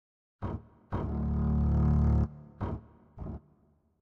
cello-1-Tanya v
short loop .made in cubase
cello, domain, strings, public, dark, sample